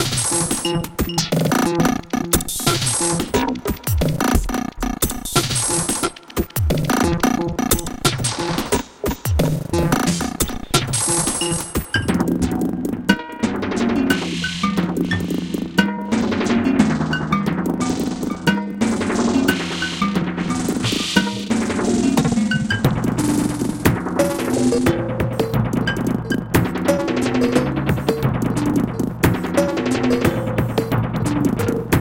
This loop has been created using the program Live included Ableton 5and krypt ensamble electronic sequencer drums plug in in the packet of reaktorelectronic instrument 2 xt